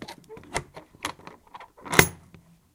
Opening a large metal latch